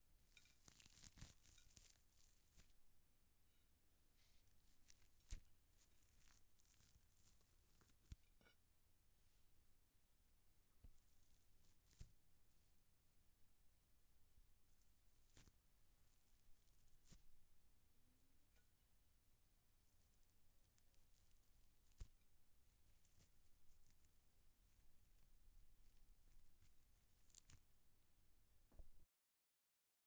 Cooked (medium) lamb meat being torn from the bone by hand.
OWI, flesh, meat, cooking